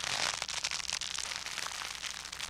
Looped Rubber-y Stretch
A looped sound of a snack bag being crushed, EQed and layered with a pitch-shifted copy of itself to sound similar to rubber stretching or being rubbed. Recorded with an SM57 going into an M-Audio MobilePre USB audio interface, edited in FL Studio. This sound can work if it's looped from start to end, but I've also set loop points for your convenience.
For the raw recordings that were used to create this, look for "Bag Crush" or something like that under my "Raw Recordings" pack. There should be two.